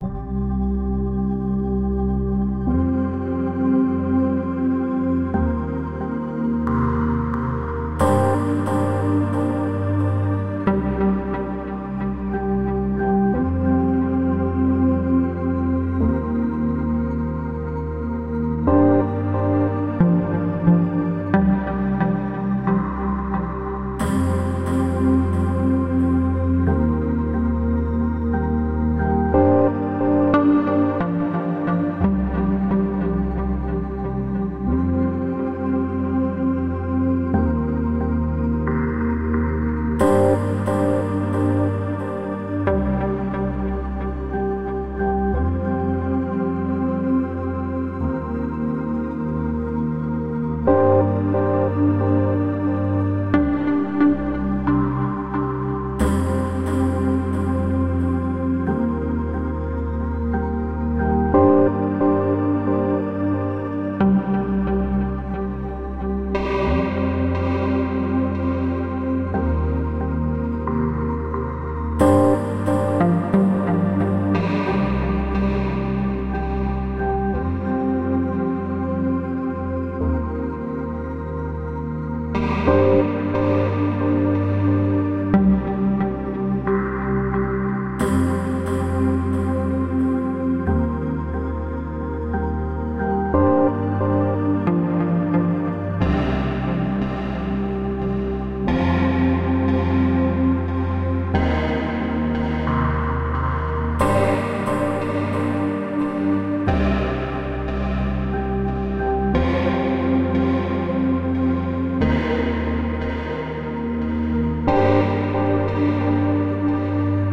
Cinematic guitar loop and fx 002
Cinematic guitar loop and fx.
Synths:Ableton live,Silenth1,Synth1
electronic, music, chord, synth, loop, electric, acoustic, ambience, pads, fx, Cinematic, original